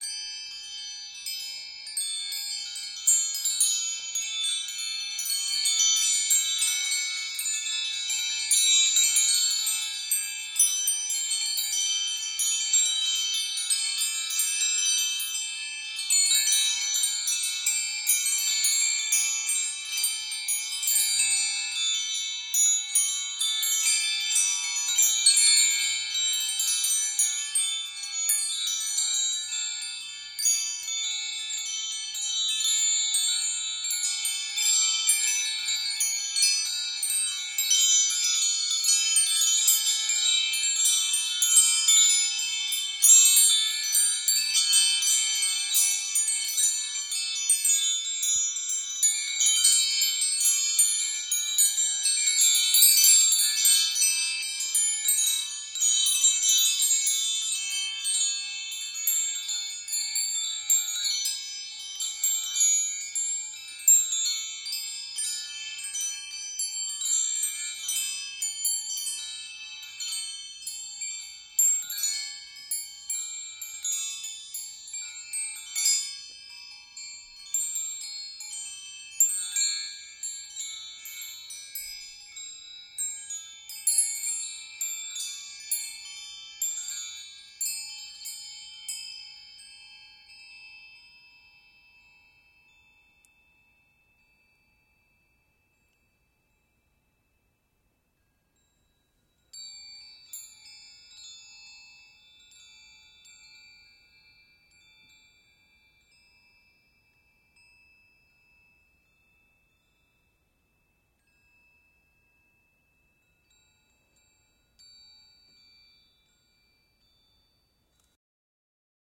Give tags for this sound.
soft
wind-chimes
background
high-pitched
melodic
tinny

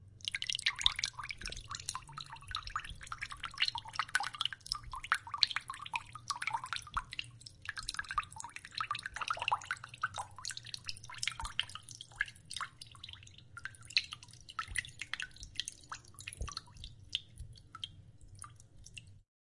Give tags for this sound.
running
water
drops
hand-made

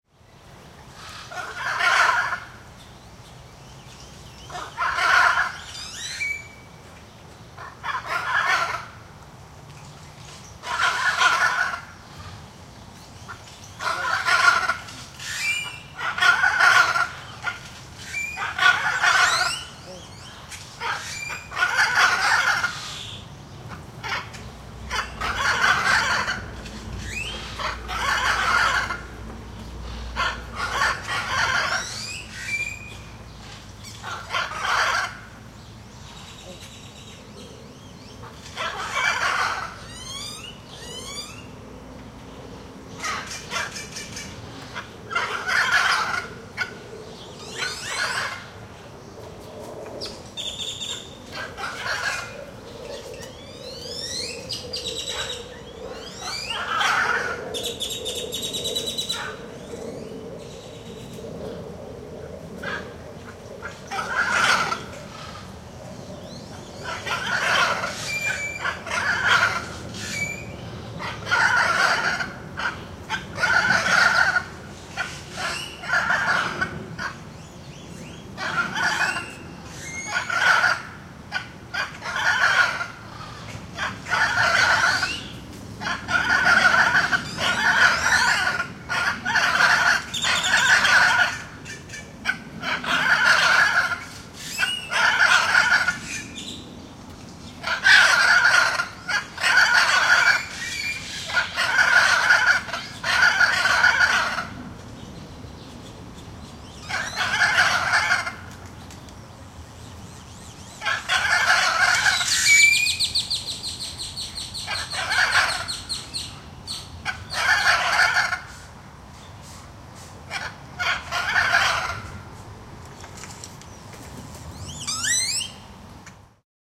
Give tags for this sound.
aviary
bird
birds
exotic
field-recording
macaw
parrot
tropical
zoo

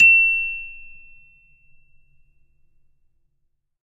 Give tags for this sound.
celeste
samples

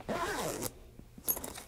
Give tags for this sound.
mochila
escola
school

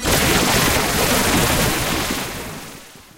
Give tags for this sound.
uad
helios
evil
q
synth
noise

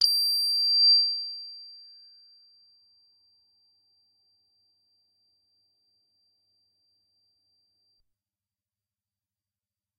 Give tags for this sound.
midi-velocity-53
D8
multisample
midi-note-110
single-note
analogue
synth
ddrm
synthetizer
cs80
deckardsdream

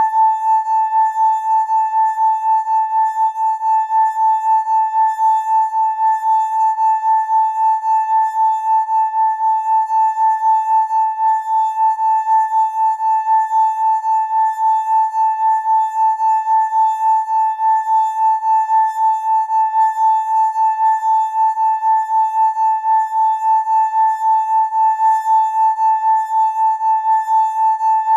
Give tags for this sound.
water
tone

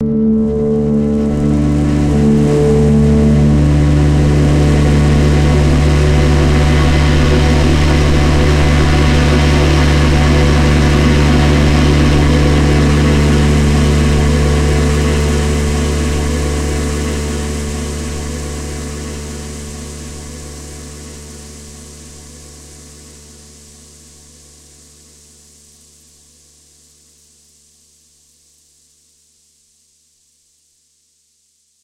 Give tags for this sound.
ambient
multisample
strings
rain
tremolo
pad
synth
granular

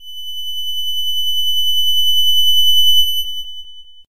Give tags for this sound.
drama
ears
horror
horror-effects
horror-fx
suspense
terrifying
terror
thrill
tinnitus

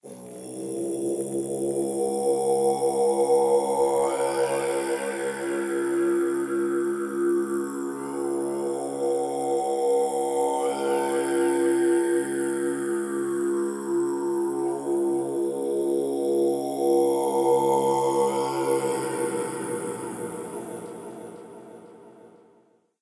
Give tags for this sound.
religion
chant
meditation
sing
meditate
hum
aip09
monk
tibetan
chanting
buddhism
throat-singing
buddhist
monks

